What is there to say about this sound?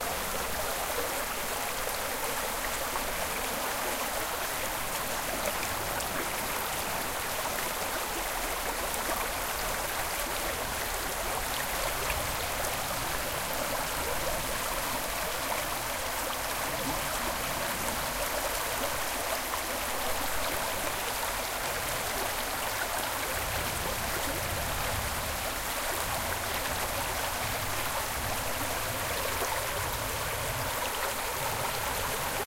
This is a brief recording of hiking boots on a gravel mountain road. May be good for a podcast as a background sound effect.
Field-Recording, Mountain-stream, Atmosphere